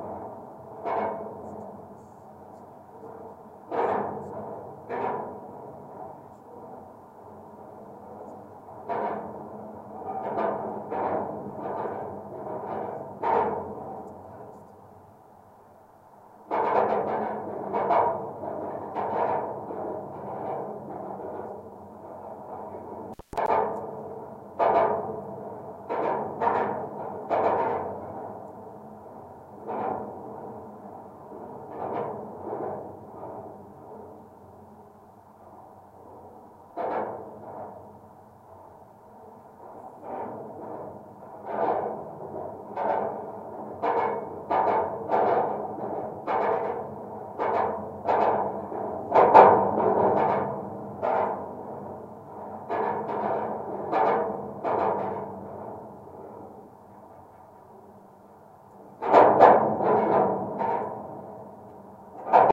GGB A0228 tower NEE
Contact mic recording of the Golden Gate Bridge in San Francisco, CA, USA from the east surface of the east leg of the north tower. Recorded October 18, 2009 using a Sony PCM-D50 recorder with Schertler DYN-E-SET wired mic.
bridge, cable, contact, contact-mic, contact-microphone, DYN-E-SET, field-recording, Golden-Gate-Bridge, metal, microphone, Schertler, Sony-PCM-D50, steel, steel-plate, wikiGong